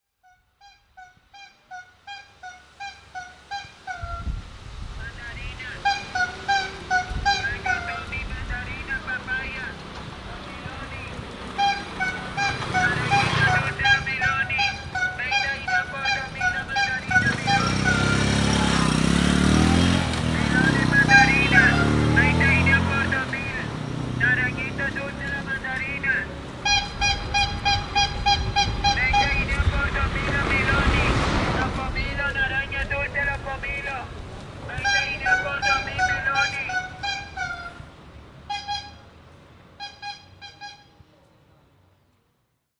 Peddlers selling Peto (typical food), on the Caribbean coast of Colombia, are announced by a particular sound. The sound is now an element of popular culture in the area, carrying connotations linked to life in the neighborhood. This sound is therefore a cultural value and has established itself as a geographical mark. There is also a mandarin fruit seller at the back.
Unfortunately recorded by a Rode mic connected to a Panasonic camera recorder.